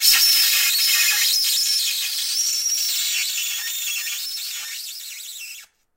Bowed Styrofoam 4
Polystyrene foam bowed with a well-rosined violin bow. Recorded in mono with a Neumann KM 184 small-diaphragm cardioid microphone from 5-10 inches away from the point of contact between the bow and the styrofoam.
harsh-noise, noise, screech, harsh, synthetic, howl, bowed, plastic, bow, high-frequency, polystyrene, polymer, styrofoam